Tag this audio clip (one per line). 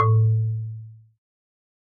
percussion
instrument
marimba
wood